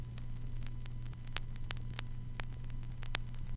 great surface noise hum1
Snippets of digitized vinyl records recorded via USB. Those with IR in the names are or contain impulse response. Some may need editing or may not if you are experimenting. Some are looped some are not. All are taken from unofficial vintage vinyl at least as old as the early 1980's and beyond.
record, noise, crackle, lofi, surface-noise, LP, vinyl, album, vintage, turntable, retro